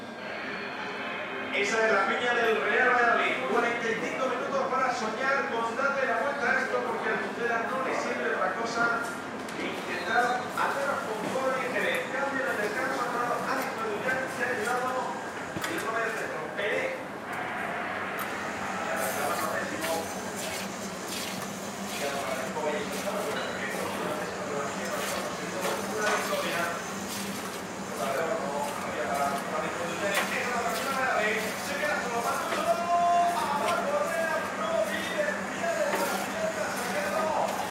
Ambiente - bar vacio, futbol en la tele 2
Environment interior bar with football on tv
MONO reccorded with Sennheiser 416
bar, football, tv